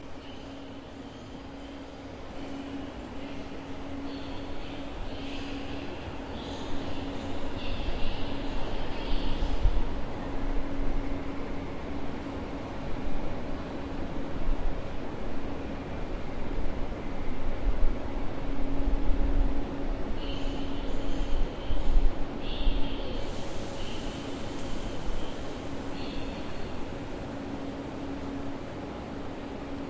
Ambience of the Sants train station in Barcelona.
arrival, announcement, railway, train, station, voice